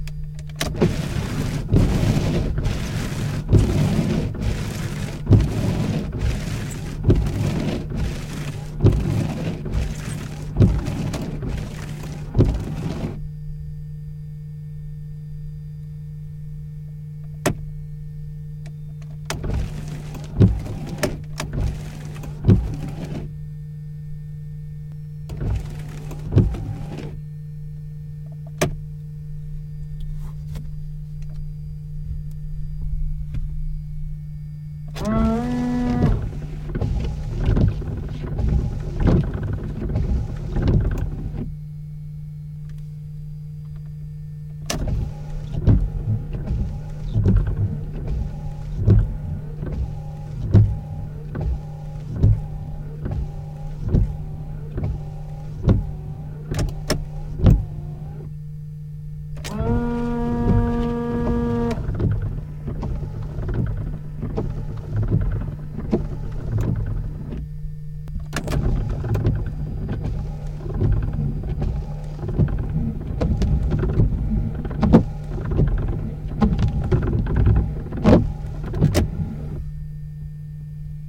Turning on and off the wiper and the soap liquid jet.